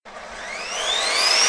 Saw Start Up
Basic saw sounds. Based off of
circular-saw, electric-tool